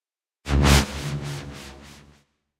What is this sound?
Woosh made with Synister synth.
Edited in Cubase Pro 10
Ricardo Robles
Música & Sound FX